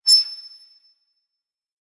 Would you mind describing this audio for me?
Synthetic Silvid
| - Description - |
Sound similar to a whistle that goes up in picht
| - Made with - |
GMS - Fl Studio.
For projects, notifications or whatever I wanted.
button, click, hi-tech, press, synthetic, short